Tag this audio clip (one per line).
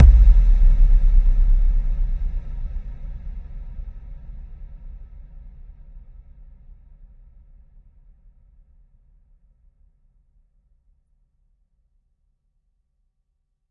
processed
stacked
bassdrum
dance
boom